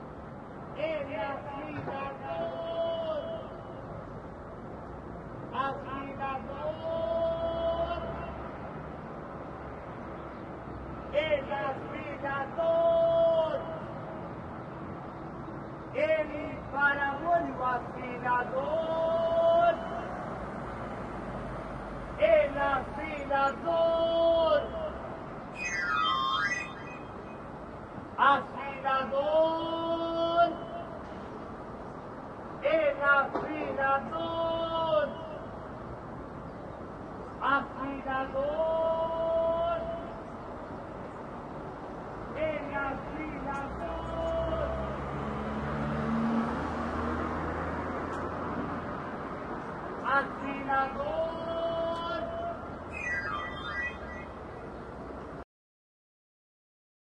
Claim the sharpener in the street.
city, street